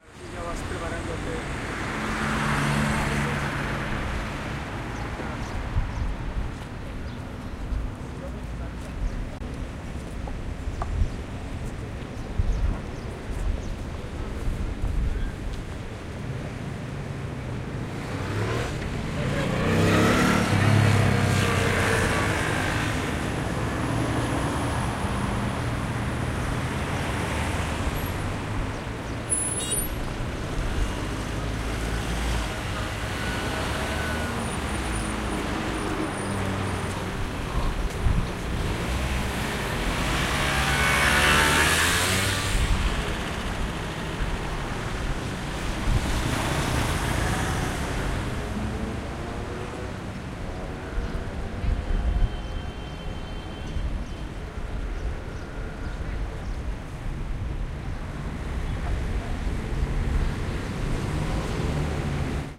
street-BCN
The streets of Barcelona. sounds of cars, scooters and people talking.
barcelona, street, field-recording